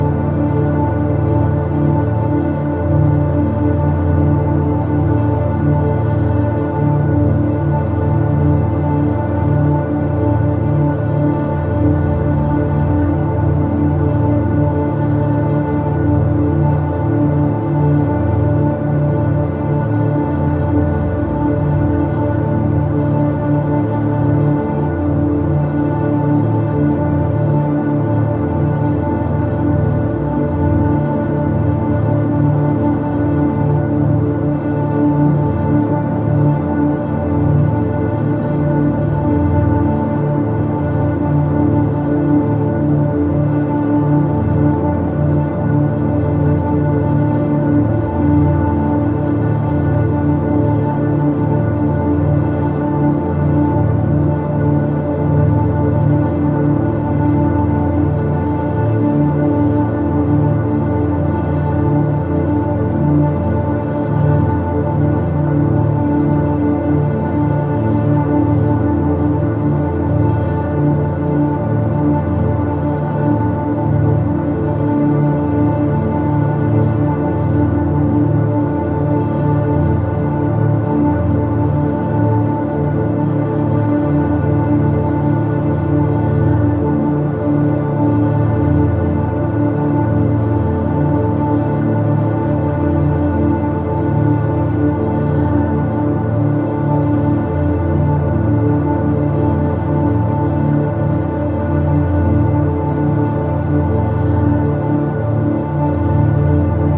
Simple dminor pad created by layering two minimalistic custom Waldorf Blofeld patches and a bit of faint Korg Monotribe. U-He's Satin tape emulation on every track.
Recorded in Cubase 6.5.
It's always nice to hear what projects you use these sounds for.